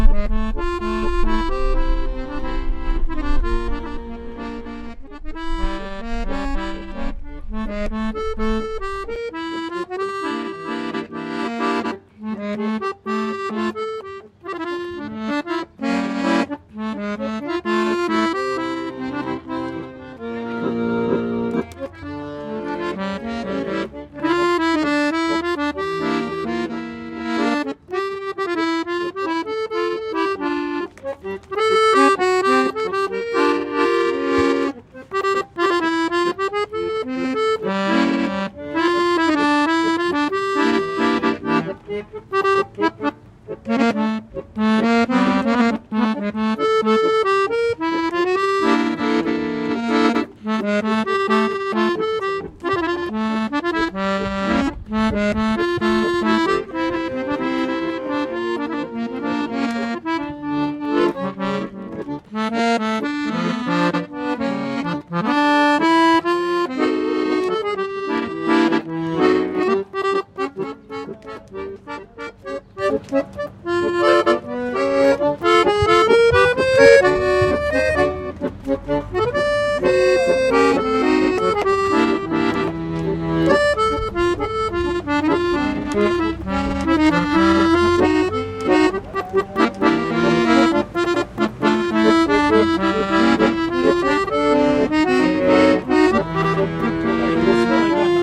road gypsies accordion
Two gypsies playing their accordions on the street after my request. Recorded with a Tascam HD-P2 and a AKG SE-300B mic (capsule CK91).